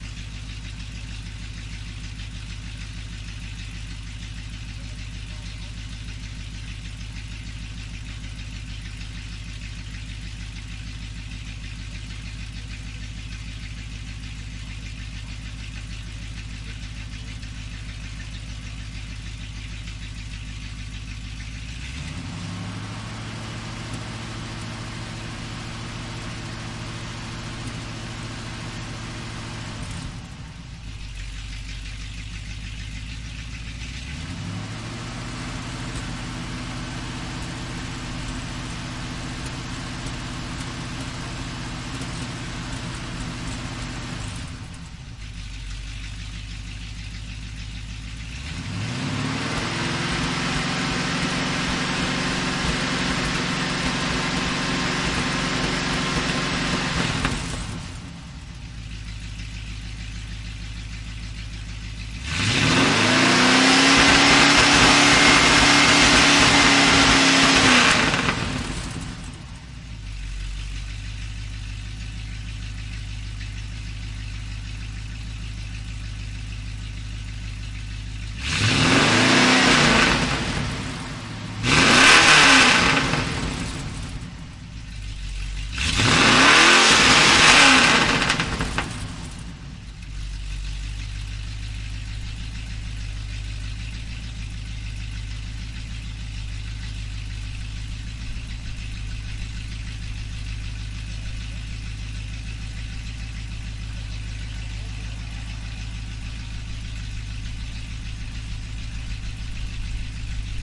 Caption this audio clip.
Various recordings of a 70's (around that...) Ford Galaxy V8
Engine, Ford, Galaxy, Motor, Stereo, V8